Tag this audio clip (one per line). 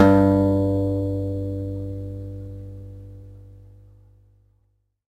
guitar
multisample
acoustic